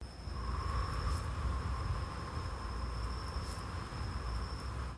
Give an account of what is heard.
Viento noche
night sound effect
ambient, noche